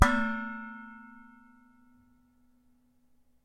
Hitting a large pot lid